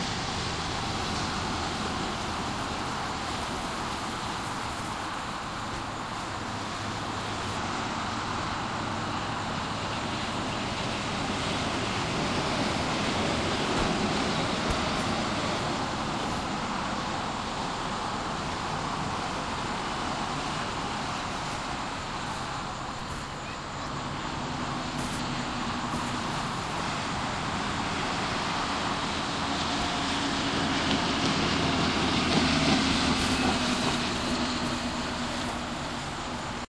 florida restarea3or32b
Florida rest stop on the way back home recorded with DS-40 and edited in Wavosaur.
road-trip, travel